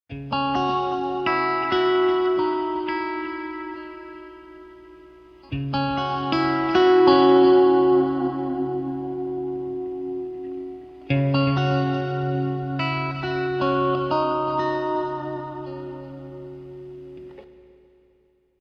These meanderings have turned into a harmonic hike